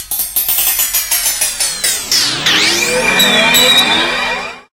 Clanged railing morphing into a guitar slide
absynth, bang, clang, envelope, fx, guitar, morph, pitch-shift, rail, slide